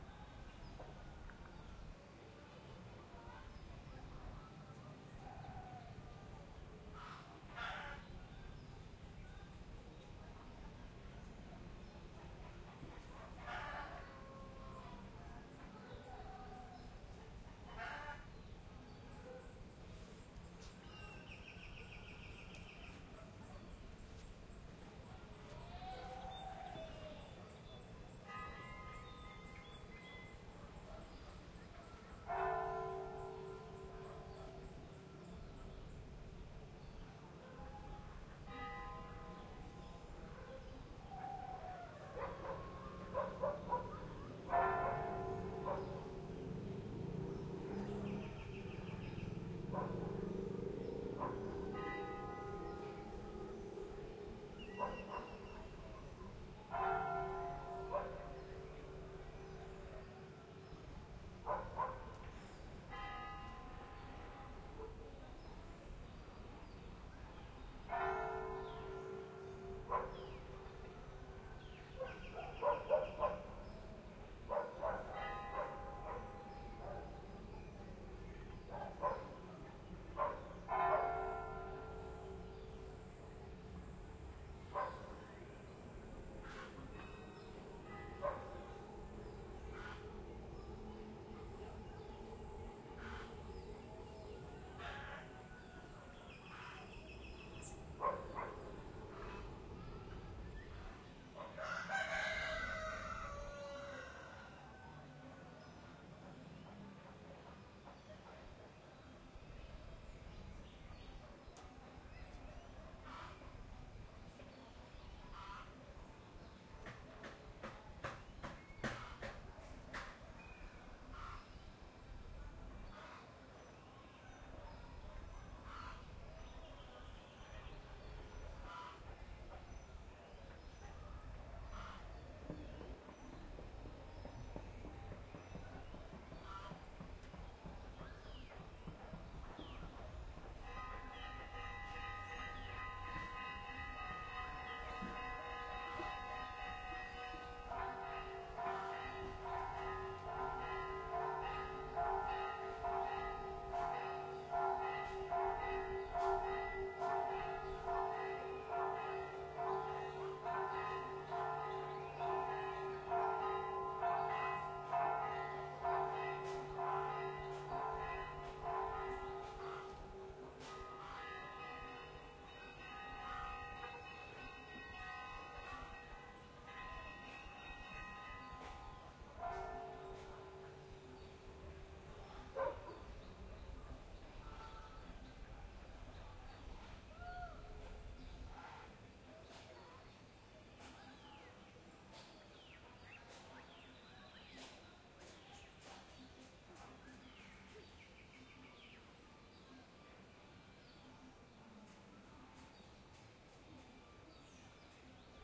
At distance from a village in the jungle of sierra mazateca (Mexico). While the village is in activity (voices, music, radio, fowls, dogs and circulation), the church bell rings twice. Axe stroxes